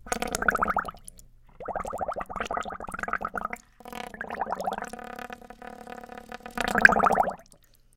Bubbling water recorded with Neumann TLM103
blowing, boiling, bubbling, bucket, hose, studio, water